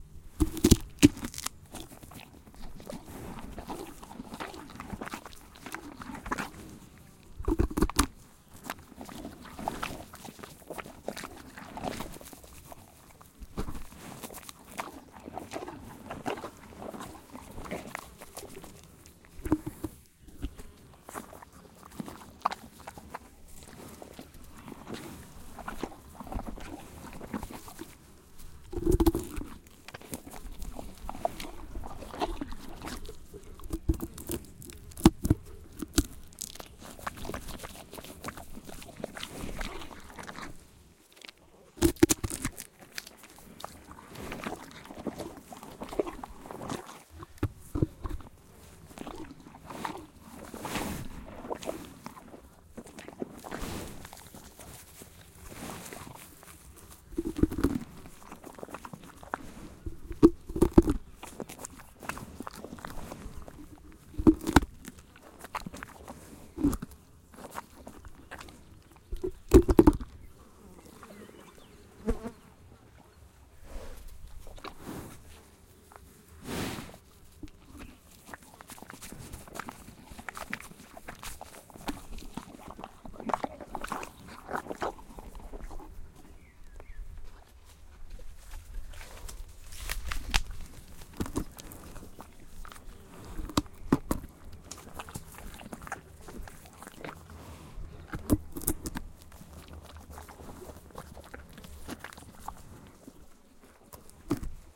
horse chewing tree bark
a field recording of a horse biting off and chewing tree bark. the flies heard on the background were circling around the horse's eyes.
PCM M10
animal, chewing, eating, flies, nature, tree, tree-trunk